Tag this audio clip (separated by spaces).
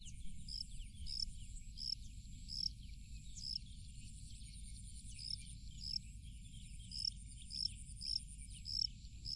Ambience Night Crickets